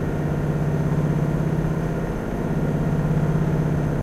hypnotic motor ferry sea
hypnotic boat motor of the public ferry transportation of Genova travelling across the sea.